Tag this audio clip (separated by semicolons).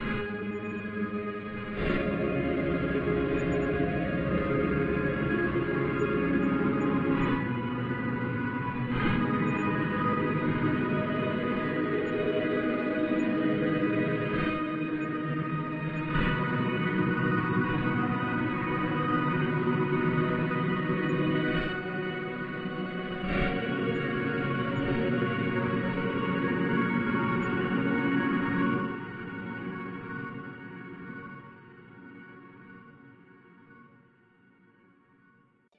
distort; distorted; music; old; short